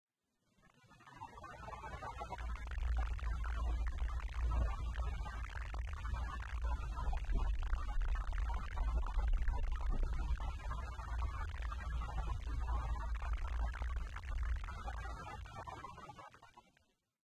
DS.Catamarca.Trip.5
Trip.5 Thermal
remembering a Trip with “DONPEDRO”, at some great landscapes at catamarca. Re-Sample of File=44289. Using SoundForge Process, Effects, tools in a RANDOM WAY, Just doing some “Makeup” at them
ambient, nature, atmosphere, sound